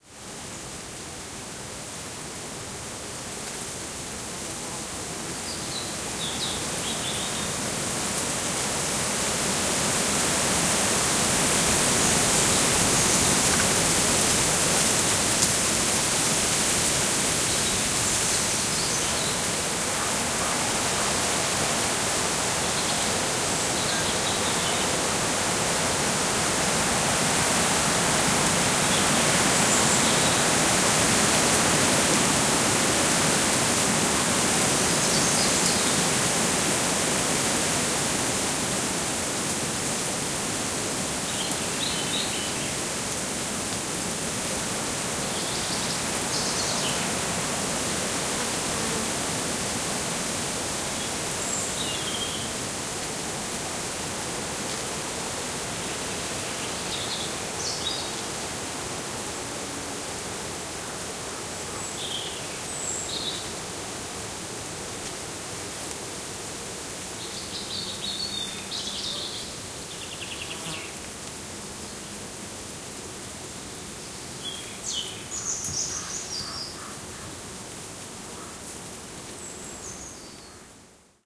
20190626.windy.forest.099
Forest ambiance on a windy day, birds and insects almost covered by noise of branches and leaves. EM172 Matched Stereo Pair (Clippy XLR, by FEL Communications Ltd) into Sound Devices Mixpre-3. Recorded near El Roblón de Estalaya, a very old Oak in N Spain (Palencia province).